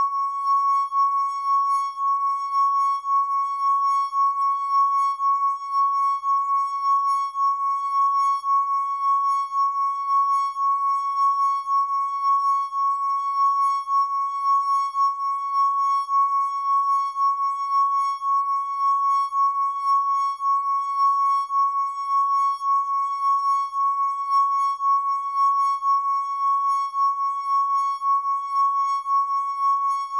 Wine Glass Sustained Note C#6
Wine glass, tuned with water, rubbed with wet finger in a circular motion to produce sustained tone. Recorded with Olympus LS-10 (no zoom) in a small reverberating bathroom, edited in Audacity to make a seamless loop. The whole pack intended to be used as a virtual instrument.
Note C#6 (Root note C5, 440Hz).
clean, drone, glass, instrument, loop, melodic, note, sustained, tone, tuned, water, wine-glass